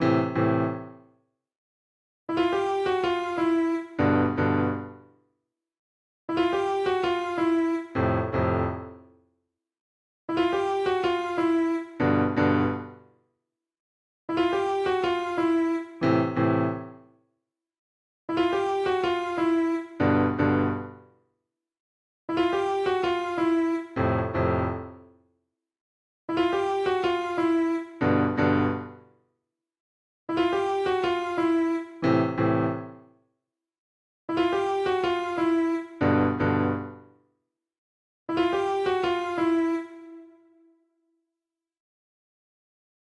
A little detective loop I created with mixcraft.
clues, detective, searching, sneak, sneaky